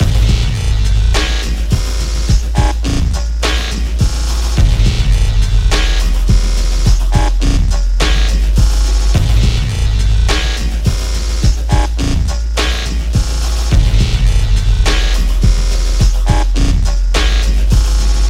Welcome to the basment (bassline)
Played at 105BPM features a dub/horror industrial sounding experiment. Good for titles screens, or mysterious dark situations